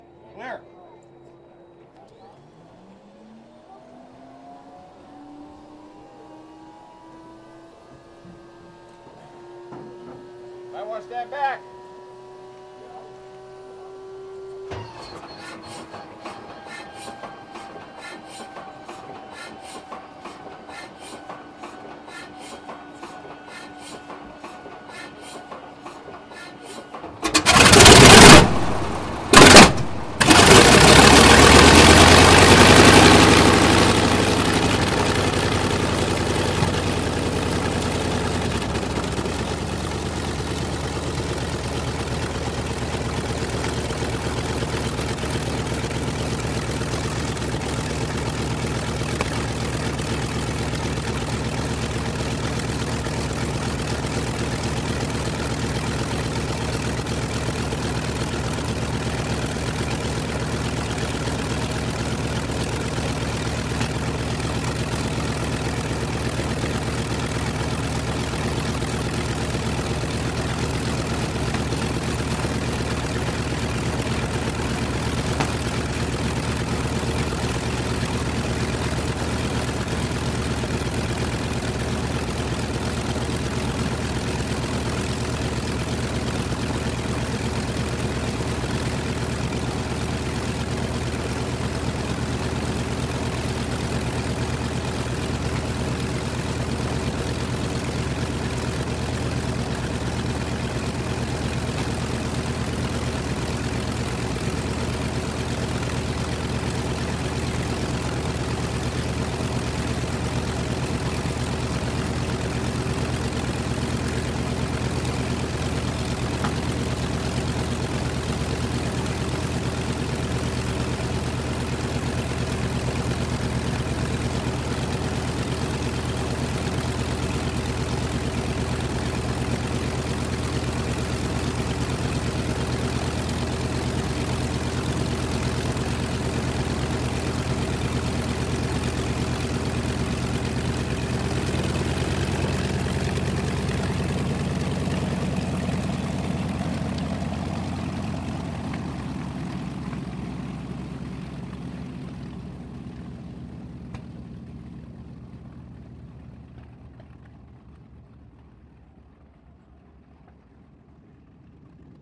B-25 ENGINE START
B-25 bomber recorded at air show. Engine spin-up with coughing, taxi away.
aviation, aircraft, bomber, military, plane